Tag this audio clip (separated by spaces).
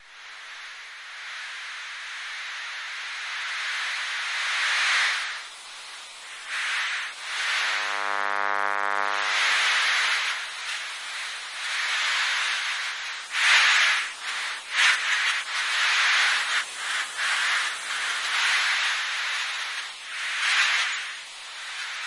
8bit electromagnetic-field elektrosluch power-plug